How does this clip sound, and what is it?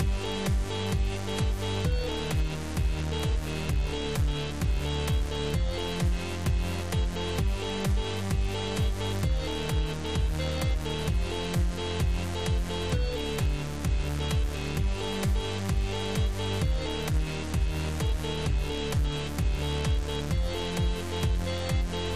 FL Amazing Melody Loop 3
soundeffect, fruity, awesome, fruityloops, simple, great, amazing, library, effect, short, cool, fl, music, original